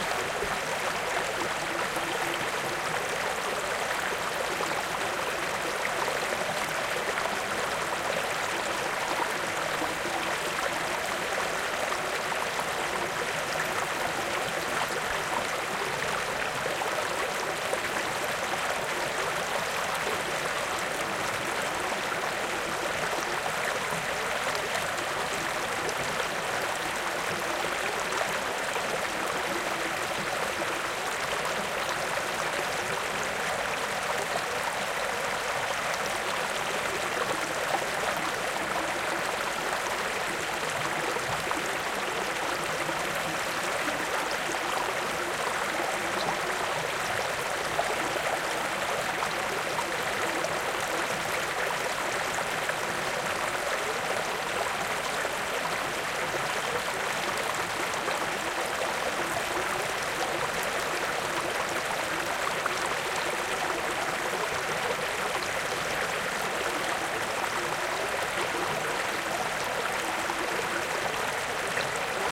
water flowing from retreating Solheimajokull glacier, Southern Iceland. Shure WL183, FEL preamp, Edirol R09 recorder
climate-change, field-recording, flickr, glacier, global-warming, iceland, nature, stream, water